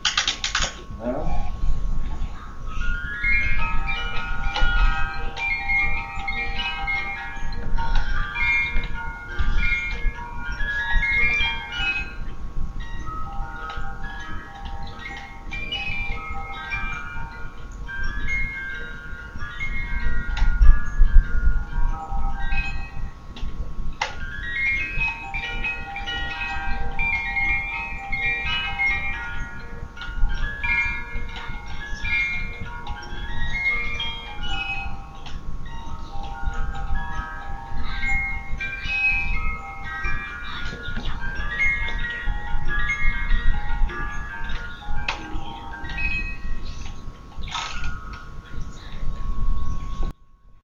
field recording from automaton theater, dolly playing
music-box, wind-up